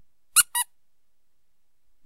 A squeak toy my dog tore up. Sqeaked in various ways, recorded with a BM700 microphone, and edited in audacity.
squeak, bm700, dog, toy